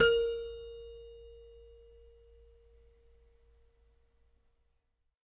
Michelsonne 70 Bb3 f
multisample pack of a collection piano toy from the 50's (MICHELSONNE)
collection, michelsonne, piano, toy